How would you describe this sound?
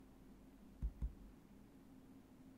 Trackpad Doubleclick
Foley of a double-click on a MacBook Pro trackpad.
trackpad, computer, click, double-click, mouse, clicking